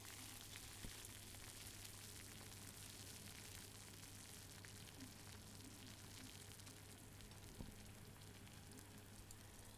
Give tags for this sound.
boiling; boiling-water; cooking; Field-recorder; kettle; Kitchen; pot

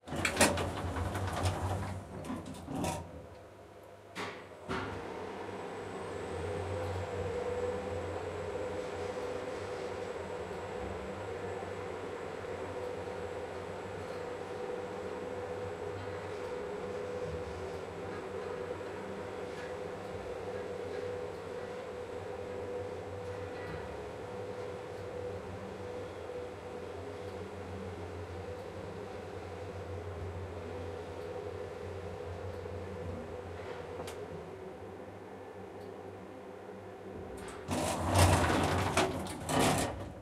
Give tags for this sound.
ambient; apartment; building; close; door; down; elevator; field-recording; floors; go; lift; open

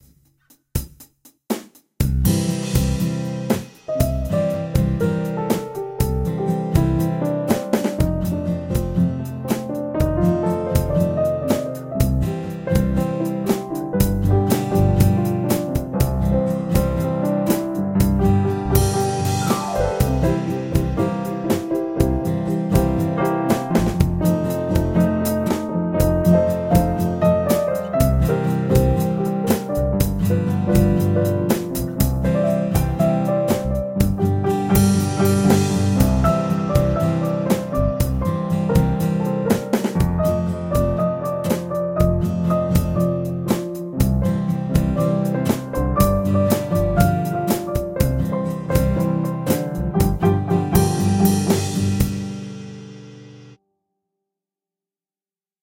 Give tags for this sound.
Boogie; loop

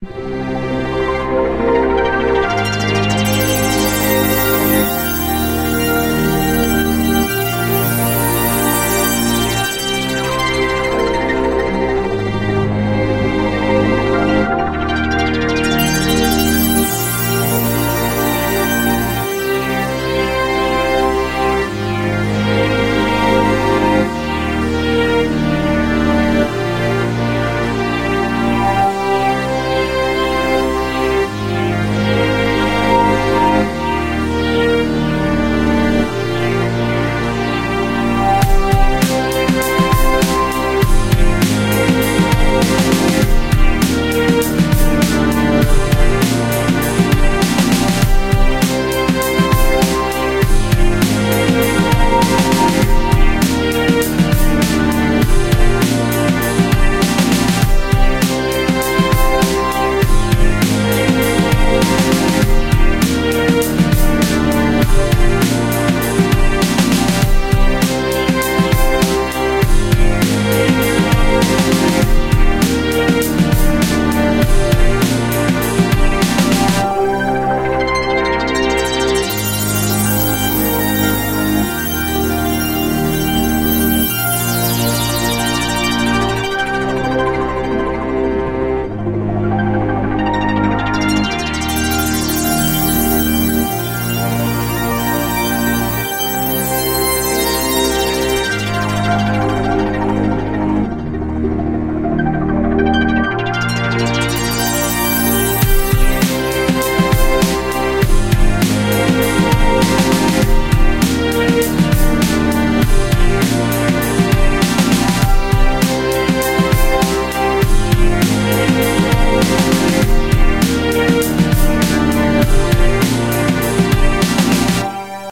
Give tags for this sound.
empires-boom; loopable-BG; strings; happyCutscene-BG; casual-loop; harp

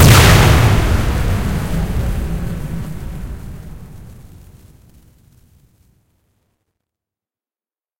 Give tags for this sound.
bomb; gun; blast; synthetic; good

